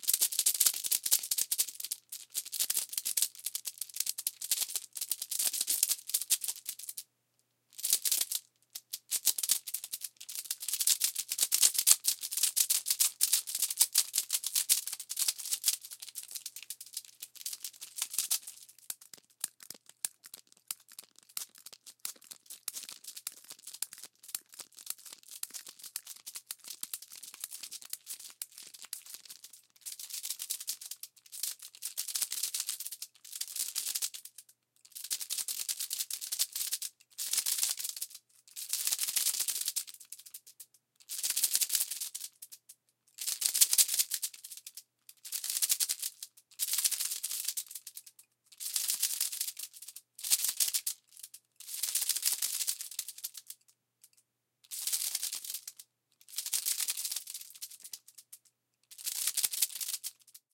FX WOODEN SNAKE TOY SHAKING
Wooden snake toy, shaking it around, makes a rattling percussive effect. Recorded with a Tascam DR-40
shake, toy